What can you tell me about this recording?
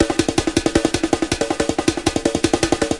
So you still want more syncopation's with some underlaying strength? Well this one mixed between 8 and 9 should do the trick.
syncopated, breakbeat, layer, drums, programmed